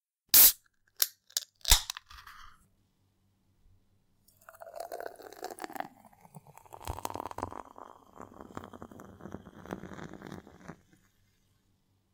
beer can open
A can of beer opened and poured into a glass.